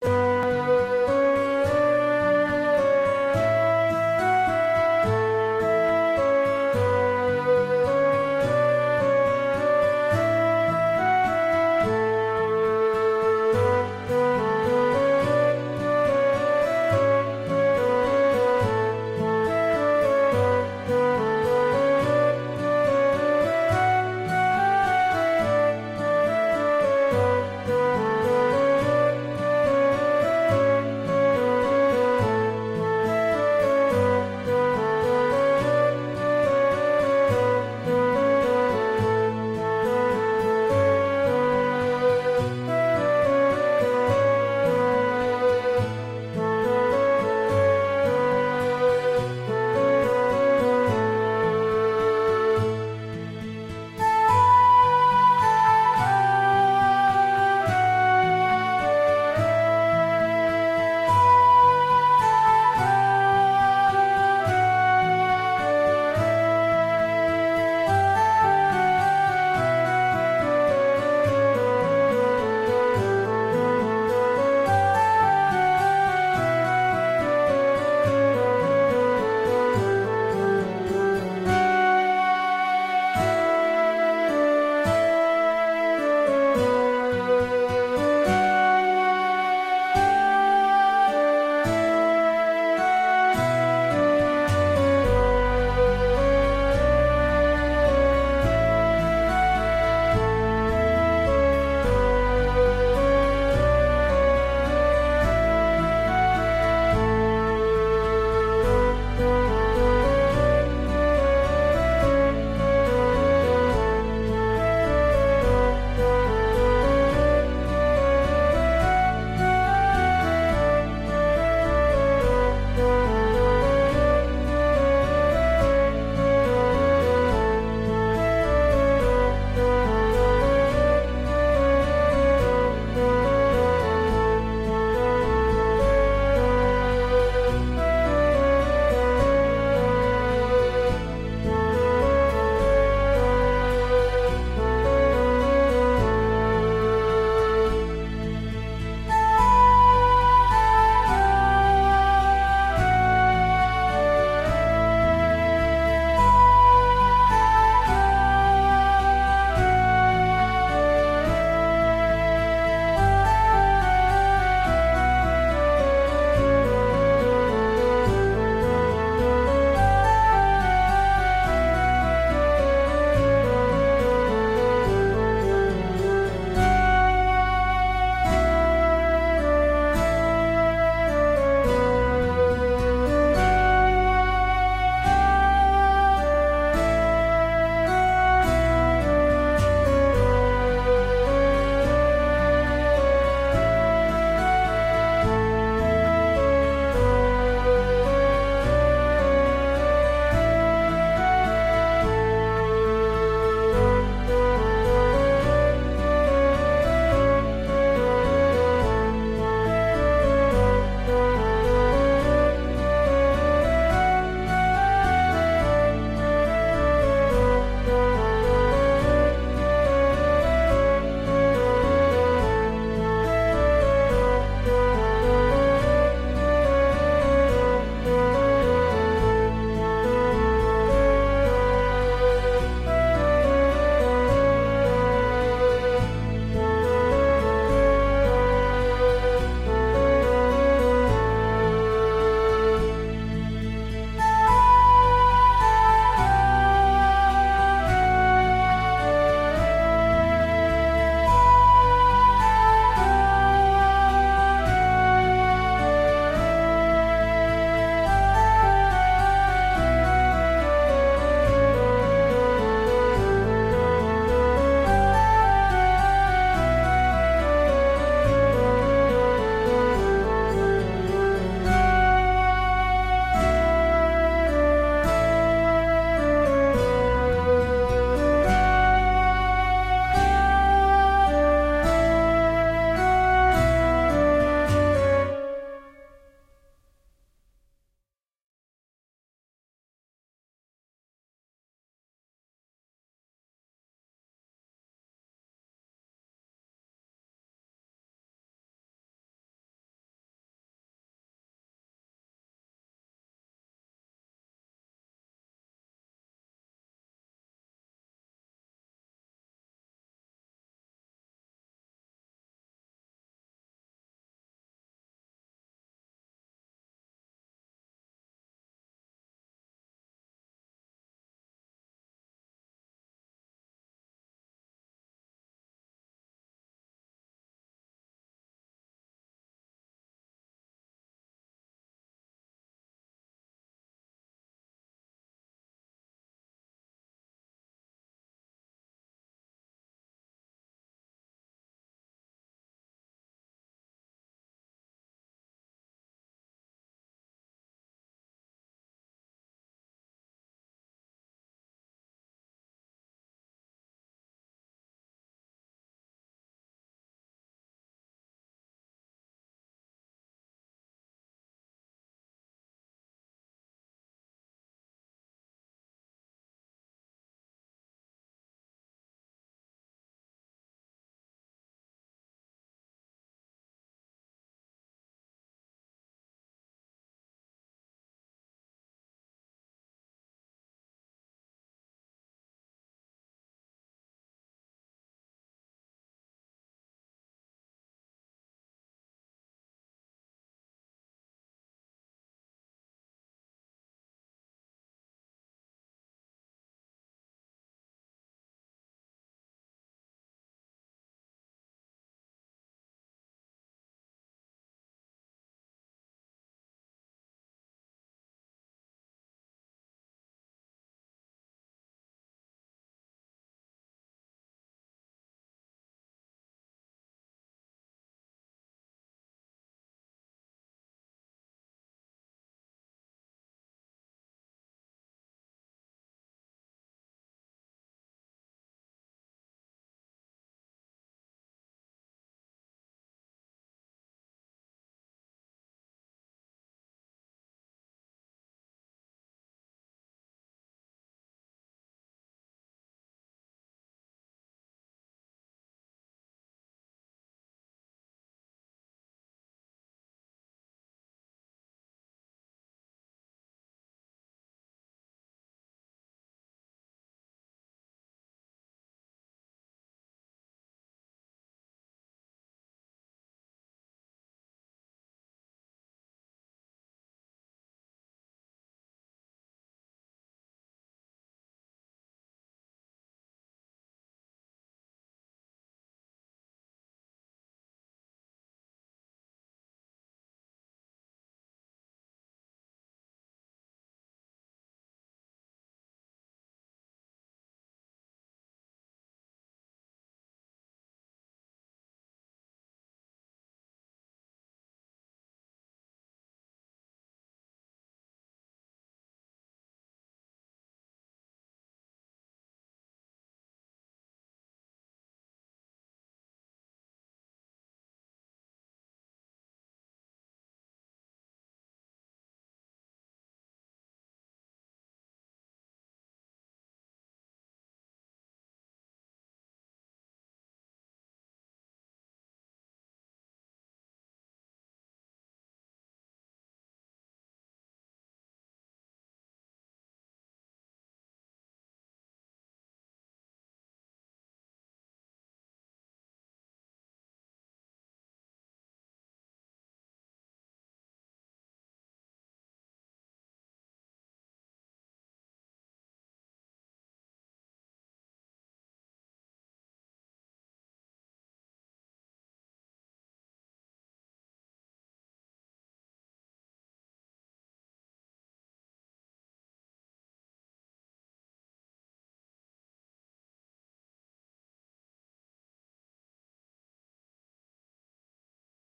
Genre: Fantasy
One of my old composition